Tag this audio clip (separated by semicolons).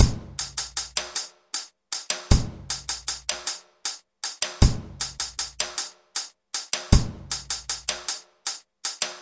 drum; pattern; 08; 8; kit; 06-08; 6-8; 06; full